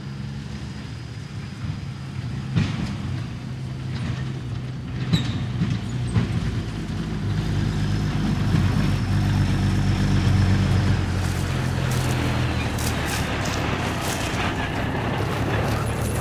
Big Truck Approach FF658
Truck, Big truck approach